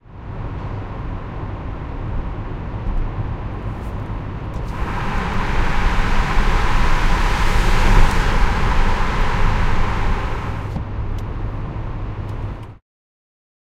Recorded with a Sony PCM-D50 from the inside of a peugot 206 on a dry sunny day.
Driving through the Piet-Hein tunnel in Amsterdam with opening and closing the window.